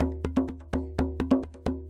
tambour djembe in french, recording for training rhythmic sample base music.
djembe, drum, loop